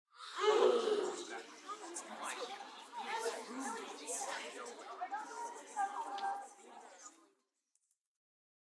AF Crowd Suprised Murmur 1
a crowd reacting to a suprise
crowd, murmur, surprise, whisper